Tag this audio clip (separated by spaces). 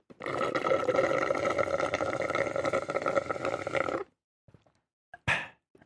beverage drink drinking sip sipping slurp soda straw tasty